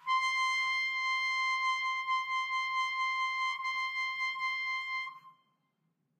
One-shot from Versilian Studios Chamber Orchestra 2: Community Edition sampling project.
Instrument family: Brass
Instrument: Trumpet
Articulation: vibrato sustain
Note: C6
Midi note: 84
Midi velocity (center): 31
Room type: Large Auditorium
Microphone: 2x Rode NT1-A spaced pair, mixed close mics
Performer: Sam Hebert
multisample, vsco-2, vibrato-sustain, single-note, c6, trumpet, midi-velocity-31, midi-note-84, brass